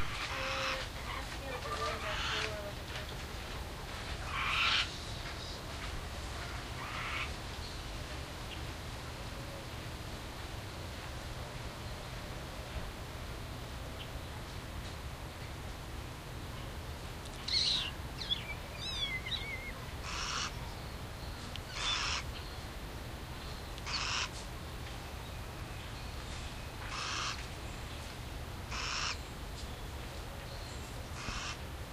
Birds recorded at Busch Wildlife Sanctuary with Olympus DS-40.
field-recording, nature, ambient, birds